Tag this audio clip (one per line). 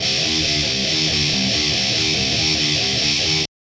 groove
guitar
hardcore
heavy
loops
metal
rock
rythem
rythum
thrash